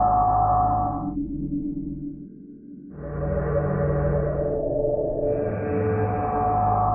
metasynth dark breath 02 loop
Darkly entrancing Metasynth loop. Sorry, it starts in the middle of the loop...
Breathy pad with creepy undertones.
~leaf
trance, ambience, dark, metasynth, loop, atmosphere, horror, synthesized, pad, breath